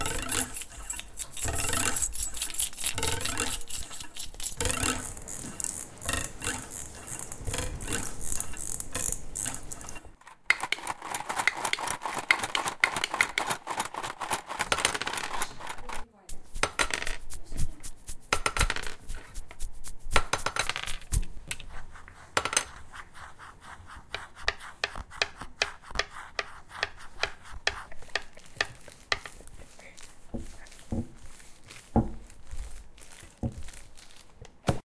SoundScape JPPT6 ClassFinlandSounds
Portugal, soundscape, 6th-grade, Joao-Paulo-II